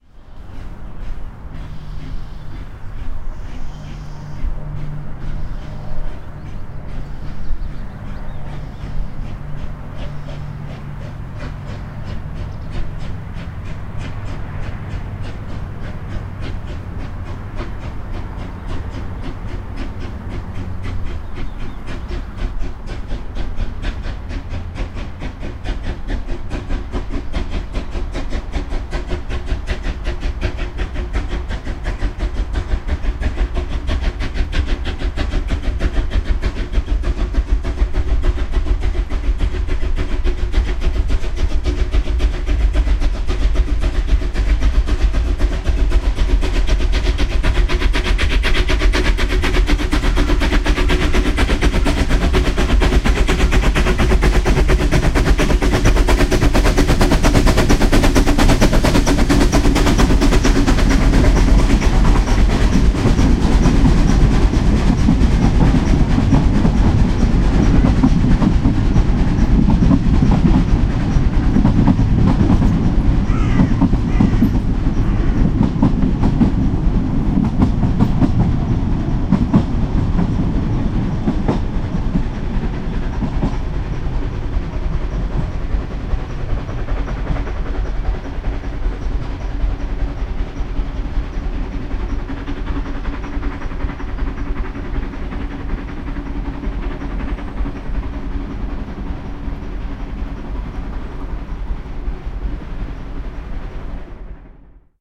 Steam Train Revisited
A stereo field recording of a narrow gauge steam train starting off uphill and it picks up speed as it gets closer. Recorded on a bend on the Ffestiniog Railway with a Zoom H2 on-board rear mics.Remastered to sound bigger.
crow, field-recording, locomotive, machinery, request, stereo